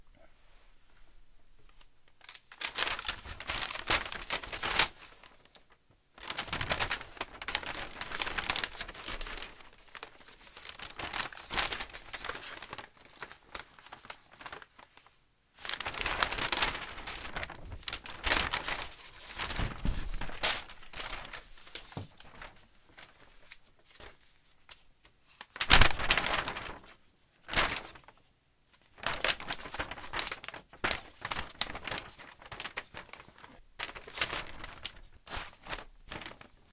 Playing with a map
Opening (or attempting to open) a map and find something on it.
Recorded for the visual novel, "The Pizza Delivery Boy Who Saved the World".